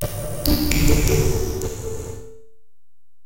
Nursery Sink 0bject count2
Awe, a nursery in a glass of water HIT LOOP!